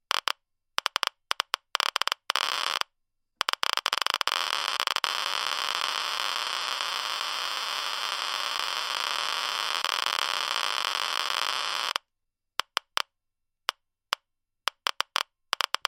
Geiger Counter Hotspot (Sweeping)
Sweeping to locate and identify an irradiated hotspot area.
This is a recording of an EBERLINE E-120 Geiger counter, which makes the "classic" Geiger click sound. Recorded with a RØDE NT-1 at about 4 CM (1.6") from the speaker.
Click here to check out the full Geiger sound pack.
FULL GEIGER
DIAL
MIC TO SPEAKER
click, clicks, counter, geiger, geiger-counter, hotspot, radiation, sweeping